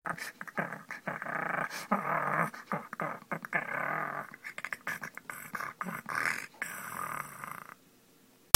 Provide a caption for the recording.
JEN - Growling
Me growling in a cutesy way, pretending to be angry